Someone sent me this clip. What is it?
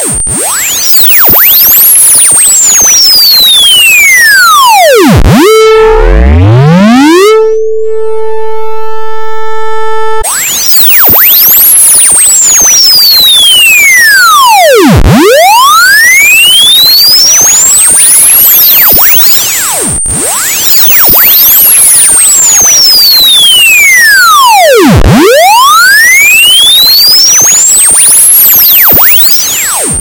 This wawe form appear in my studio naturally.
Is not from the space o short wawe .
HETERODYNE, MIXING, NATURAL, OSCILATION, WAWES